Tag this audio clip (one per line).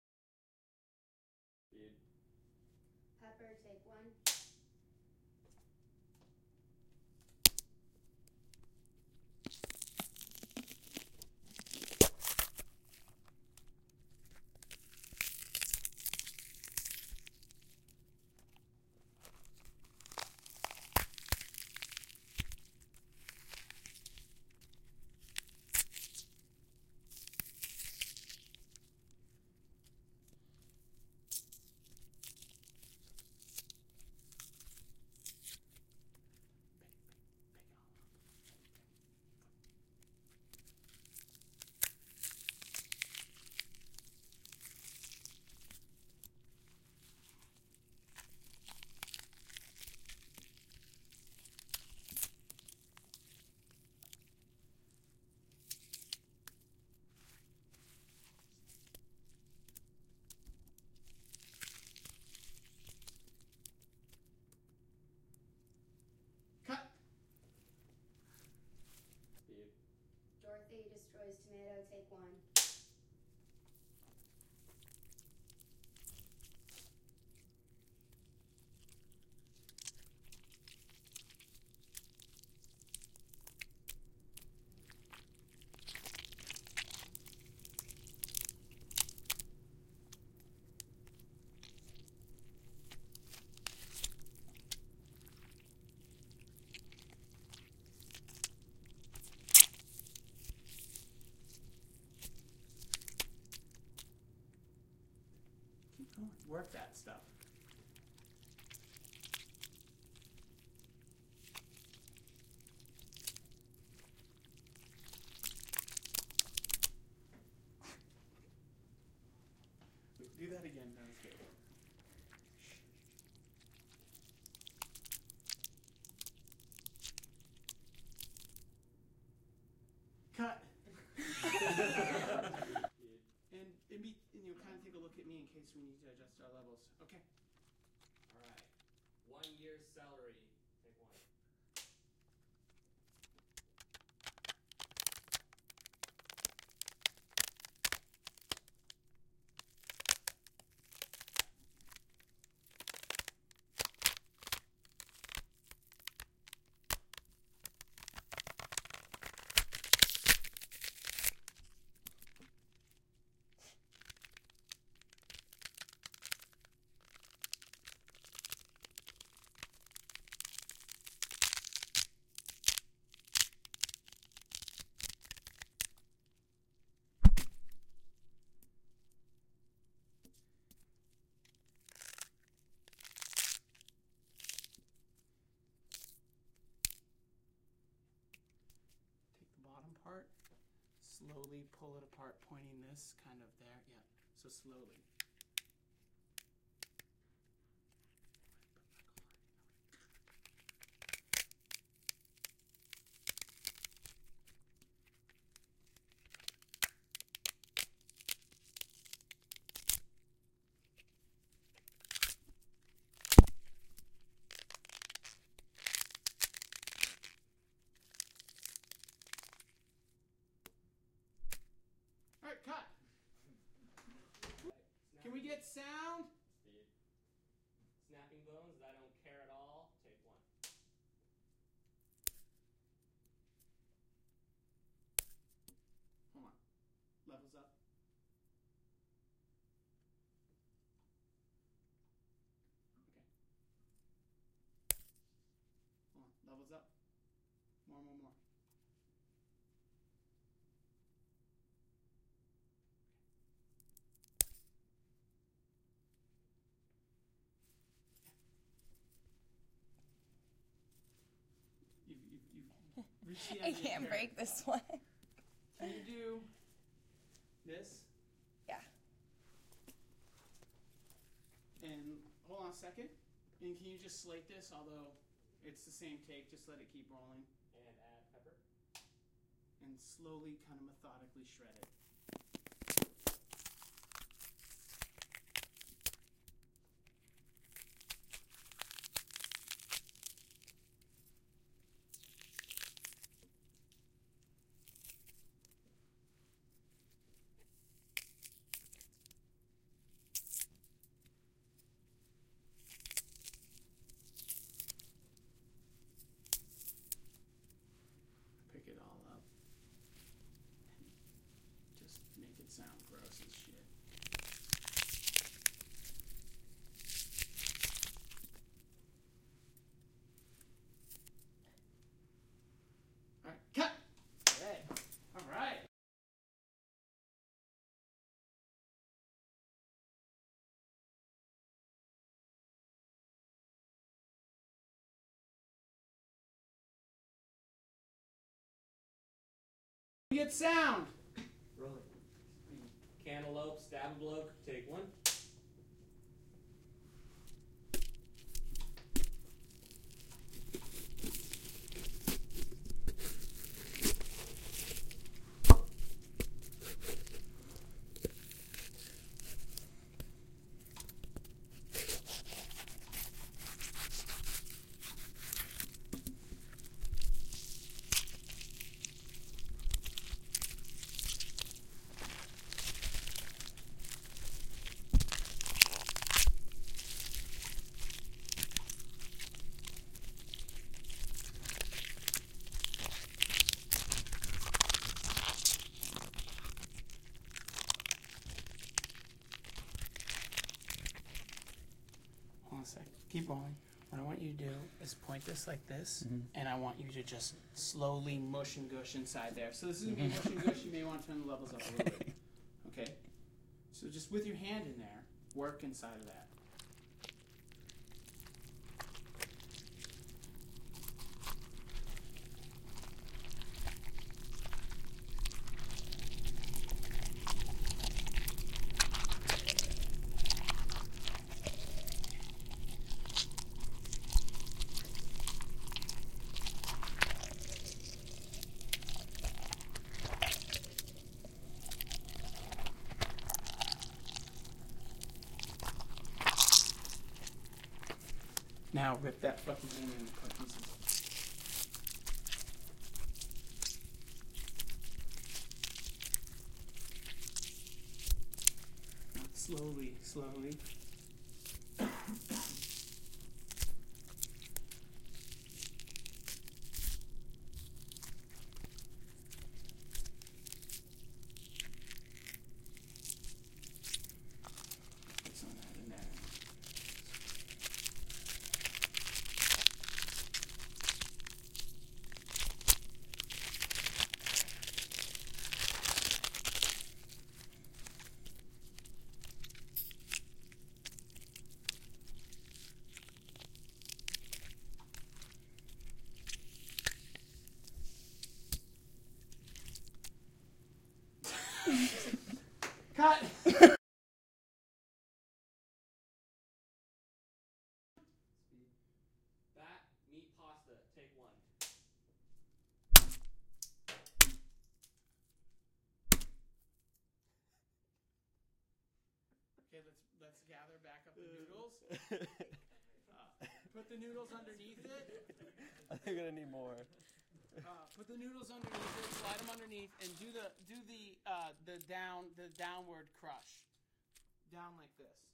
dead-season
zombie
splat
foley
blood
gore
flesh
horror